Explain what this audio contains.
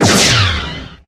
A quick lazer blast